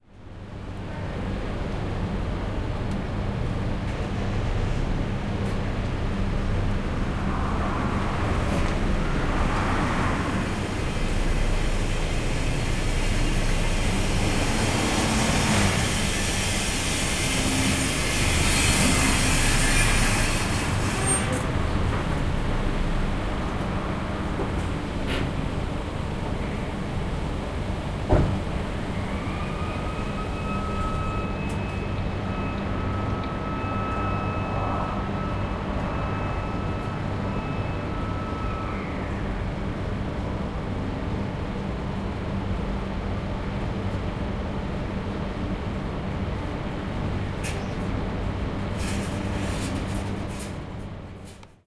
Faulty truck arives at furnace depo
Note: first attempt at a decent sound effect. A faulty truck arrives and stops at the foundry, it appears that the truck had a length of wire stuck on one of its axles. Enjoy, recording equipment used, zoom H4N Pro, onboard microphones 120 degrees.
arrives, depo, faulty, field-recording, furnace, fx, industrial, metal, noise, sound-effect, truck, wire